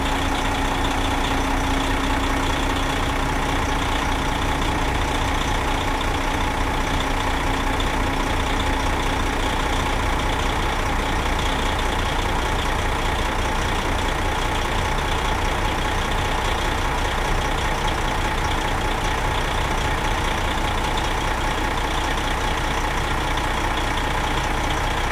bus coach ext diesel truck engine idle throaty
ext, bus, engine, coach, truck, idle, throaty, diesel